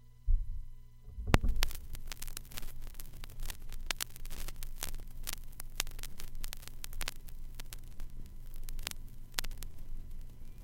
A collection of stereo recordings of various vintage vinyl records. Some are long looping sequences, some are a few samples long for impulse response reverb or cabinet emulators uses. Rendered directly to disk from turntable.